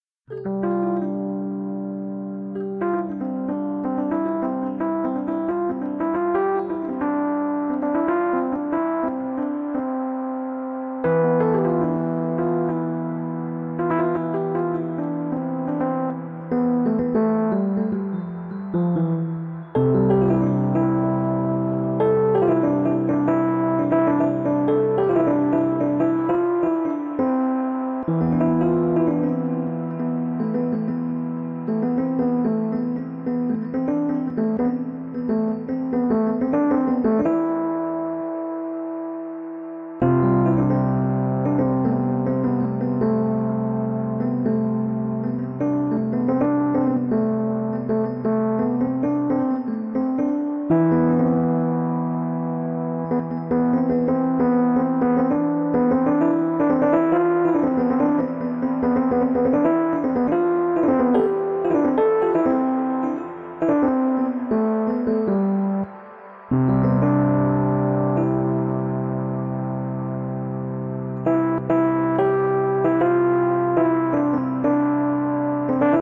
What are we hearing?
KEY-MELODY
a key melody
keys, melody, jingle, music